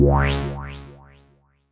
wah synth sound mad with Alsa Modular Synth
wah, synth